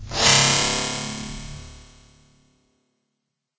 Artificial Simulated Space Sound 07
Artificial Simulated Space Sound
Created with Audacity by processing natural ambient sound recordings
space, alien, sci-fi, ufo, experimental, pad, spaceship, ambient, effect, spacecraft, atmosphere, drone, artificial, fx, soundscape, scifi